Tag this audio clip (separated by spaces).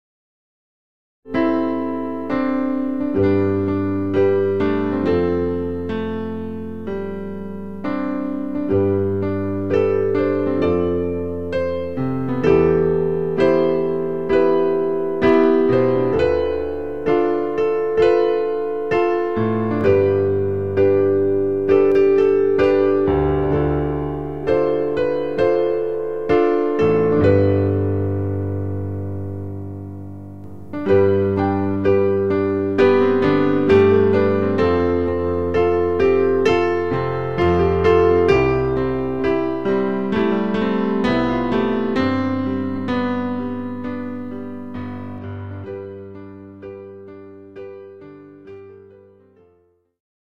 Original Sample Piano